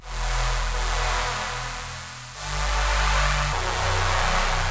biggish saw synth d a b e 198 bpm